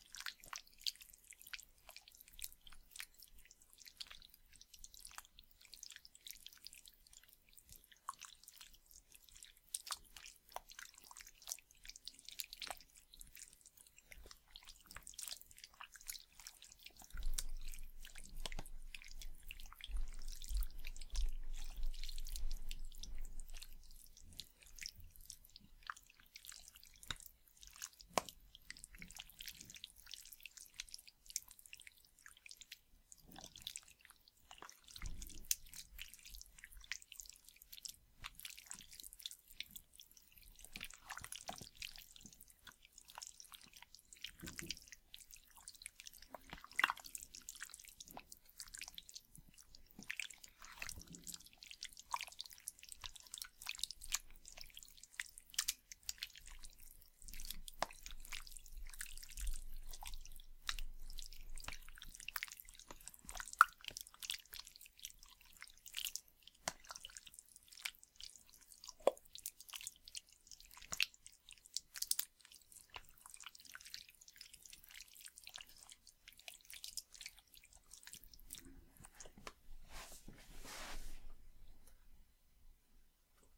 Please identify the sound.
An organic squishy sound. Very wet and slimey sounding. Taken by getting a large bowl of very thick noodle soup at cold temperature and stirring it with a spoon.